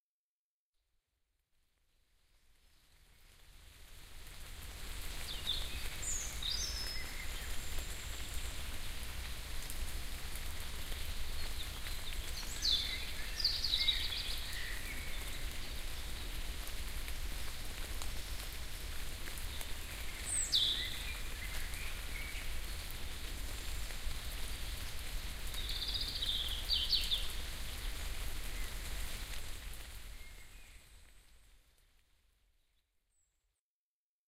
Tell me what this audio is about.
light rain in forest
This recording was made on the 12.05.2004, using the Soundman OKM II with the Sony portable DAT recorder TCD-D7 and the SBM-1 (Super Bit Mapping) from Sony, in a forest called the Bueckeberg near Minden/Germany. A light rain on the leaves, plus a few birds can be heard in this short recording.
Fade in/out was used on this track, but no filters.
field-recording, forest